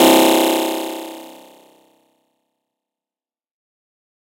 Blips Trails: C2 note, random short blip sounds with short tails from Massive. Sampled into Ableton as instant attacks and then decay immediately with a bit of reverb to smooth out the sound, compression using PSP Compressor2 and PSP Warmer. Random parameters, and very little other effects used. Crazy sounds is what I do.